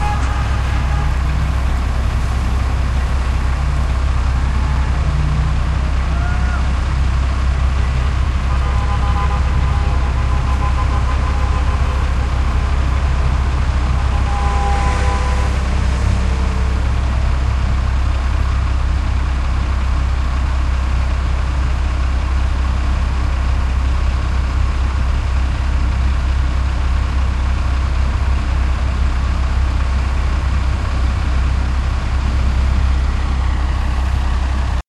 smallrally trafficperspective
Sounds of the city and suburbs recorded with Olympus DS-40 with Sony ECMDS70P. Suburban traffic and protesters at a rally opposing government run medical insurance.
protest, traffic, field-recording